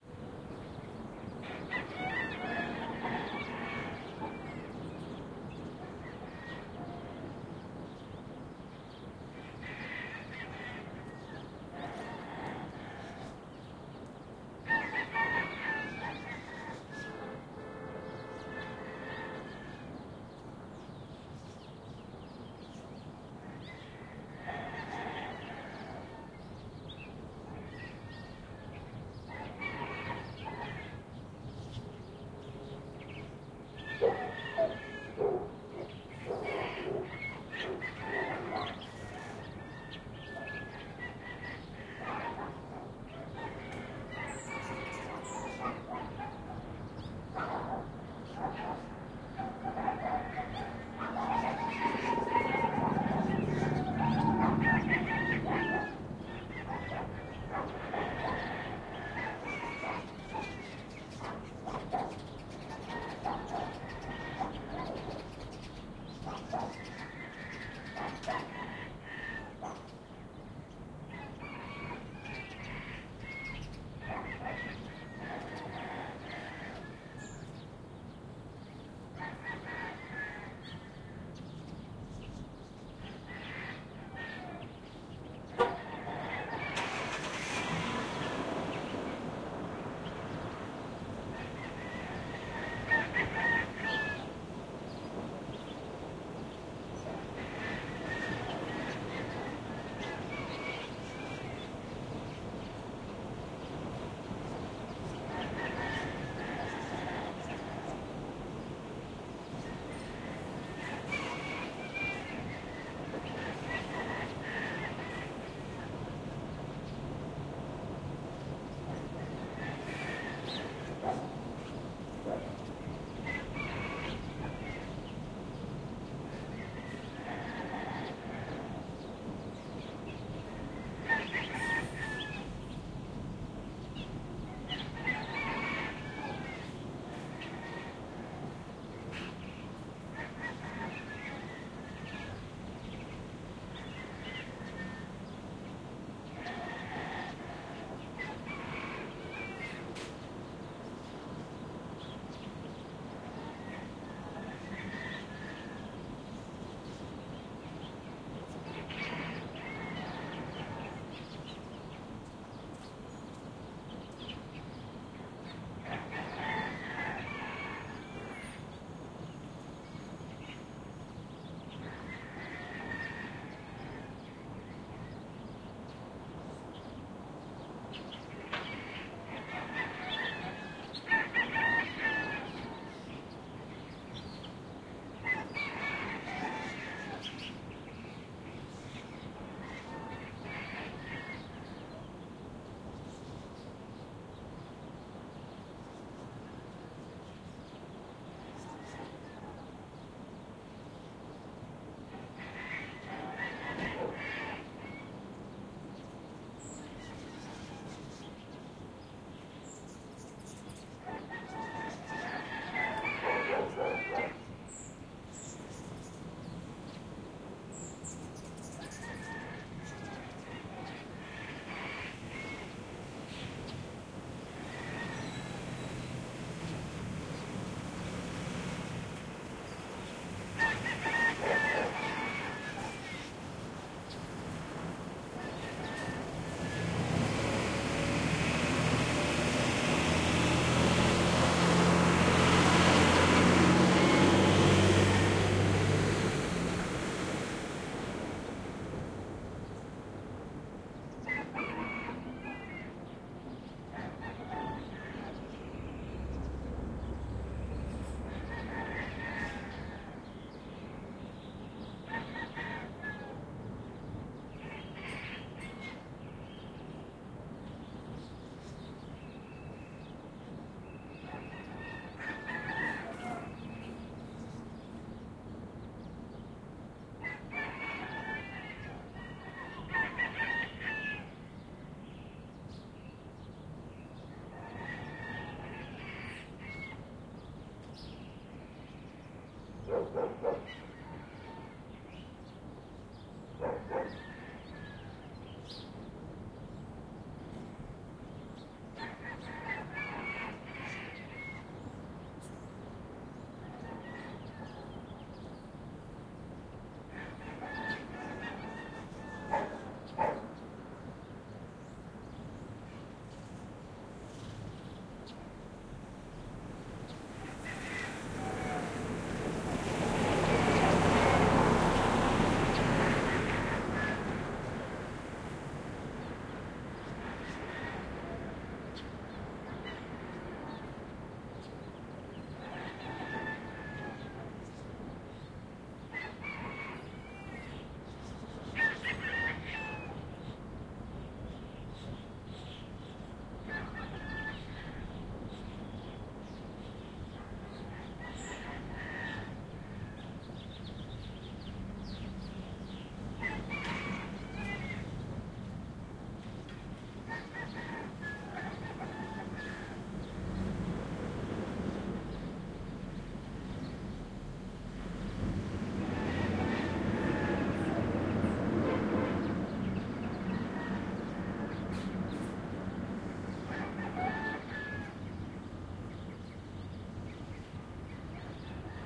AMB S EAST LA MORNING 2
My wife and I just recently moved to East Los Angeles, and the sounds are new and wonderful. Lots of chickens, no more automatic sprinklers, and lots of early riser heading off to work. This is a stereo recording of the early morning in our new neighborhood.
Recorded with: Sound Devices 702T, Beyerdynamic MC 930 mics
chicken, residential, rooster